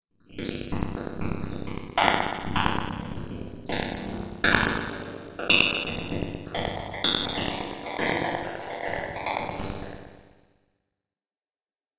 Granulated and comb filtered metallic hit
comb, grain, metal, resonant